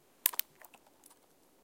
I recorded a sound of breaking a twig in the forest.